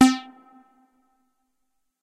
MOOG LEAD C
moog minitaur lead roland space echo
echo, lead, minitaur, moog, roland, space